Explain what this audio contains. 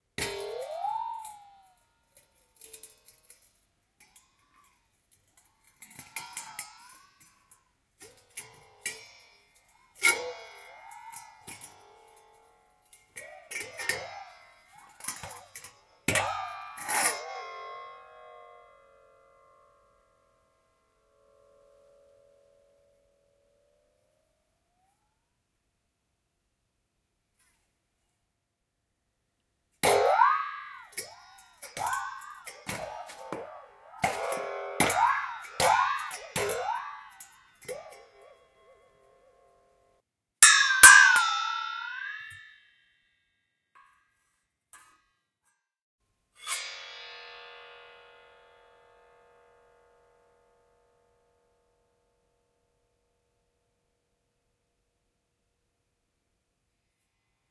Two Man Saw - Manipulations 1
1.5 meter long crosscut two-man saw with wooden handles being bent, scratched or dropped using various tail alterations and manipulations. Occasional disturbance in the left channel due to unexpected recording equipment issues.